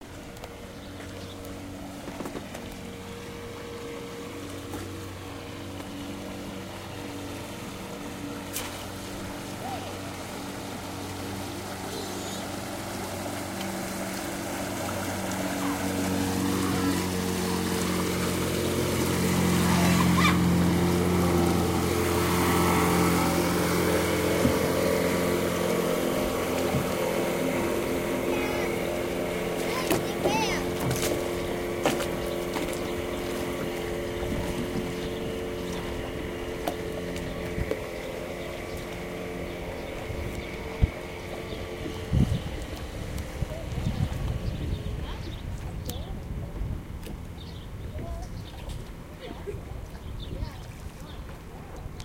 Boat Passing By The Dock
I was standing on the dock of our marina and recorded this small boat passing by. Recorded with my Sony stereo recorder.
Boat, Passing, Stereo